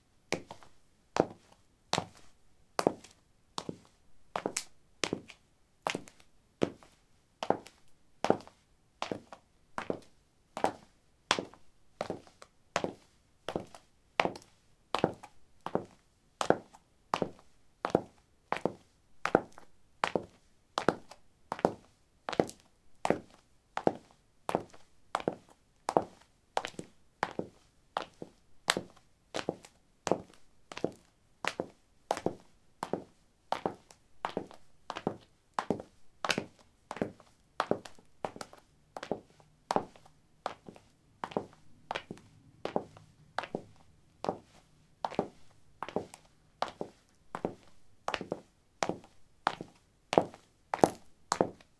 concrete 09a highheels walk
Walking on concrete: high heels. Recorded with a ZOOM H2 in a basement of a house, normalized with Audacity.